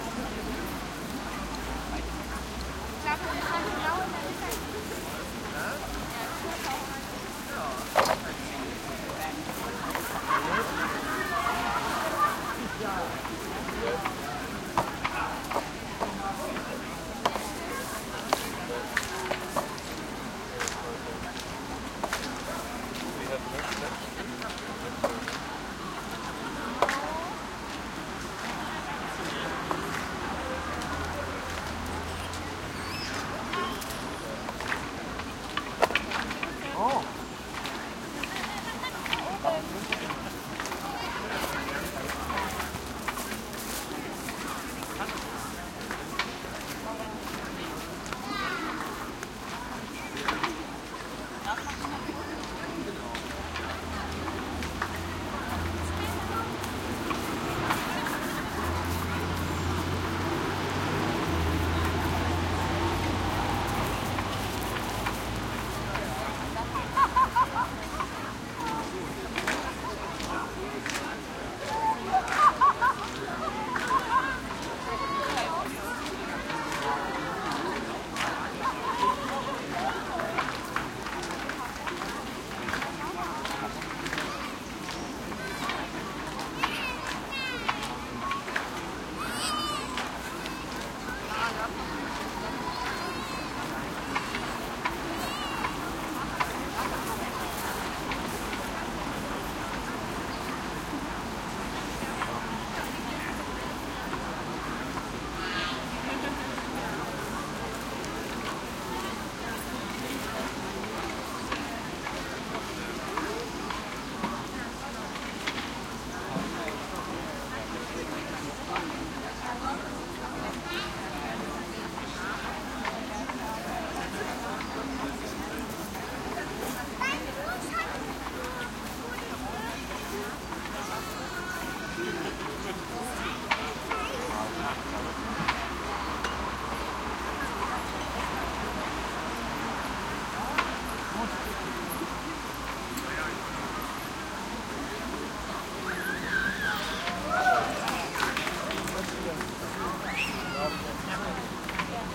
outdoor community festival wide general activity crowd german and english voices and movement steps grass, distant banging construction nearby traffic and tree wind
community, festival, outdoor, people